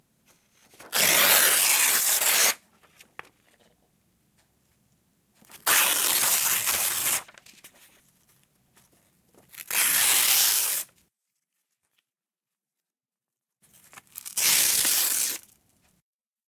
Ripping Paper 1
break, breaking, denoised, edited, foley, free, h5, high, high-quality, paper, quality, ripping, sample, sound, stereo, tearing, tearing-apart, tearing-paper, zoom, zoom-h5